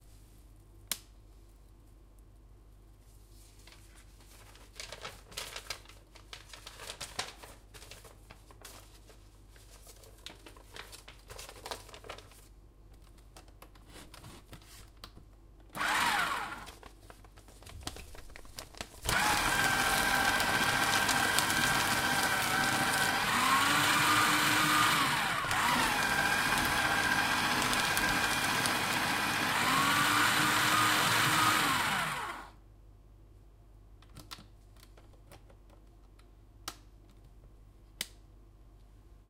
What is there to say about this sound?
Sound of shredder.
Recorded: 2012-10-22.
paper, office, noise, shredder